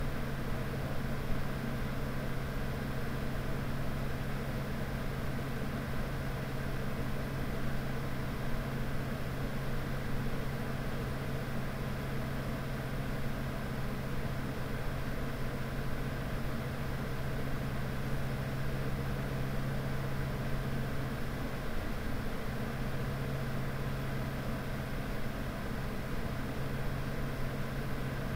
computer close
A recording of my PC from about 30cm away. Edited so it loops nicely.
Recorded using a Zoom H1 with the built in stereo microphones.
computer, field-recording, machine, pc